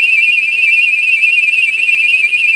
Simulation of the sound some of those foam footballs and darts with whistles on them make when flying.
I took my Nerf Whistle sound, duplicated it, pitch-shifted the copy a bit. Then I applied ~80% tremolo at 10Hz to both, with the copy 180deg out of phase.
It sounds pretty good with a doppler effect
Exited in Audacity